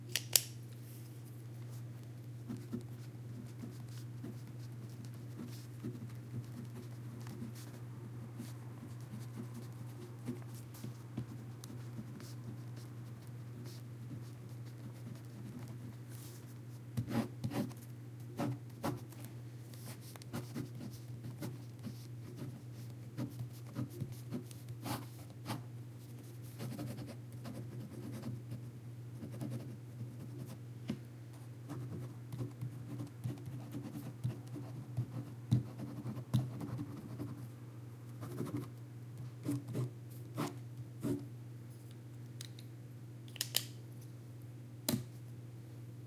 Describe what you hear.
A pen writing on paper. It's not rocket science. Or maybe it's a formula that could change the world!!!!